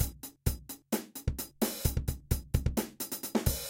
Drum Loop 130 bpm
drums, loop, realistic